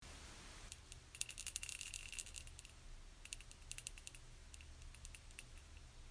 I managed to get ahold of my grandmother's bell collection. Most of these are very small and high pitched. This one is the smallest, about the height of a pinkie finger. It doesn't make a pitch so much as a noise, like a marble hitting something or rolling on a table.